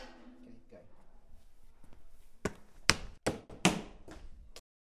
My teacher is walking up these stairs using an AKG C1000 and my mac.
natural, hit, steps, beats, click, walking